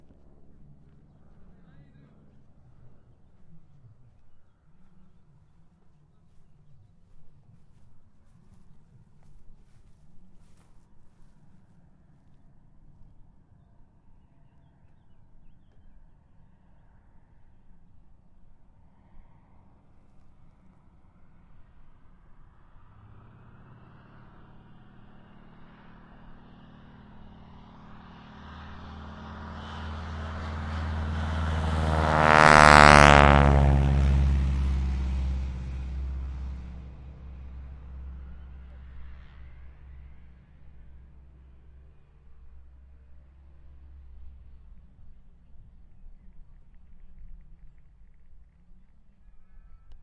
fnk airplane texan
airplane, texan, motor, 16-bit Integer (Little Endian), Stereo, 48,000 kHz, zoomH4
airplane, 48, ink, Stereo, motor, plane, fnk, kHz, 16-bit, texan, f, zoomH4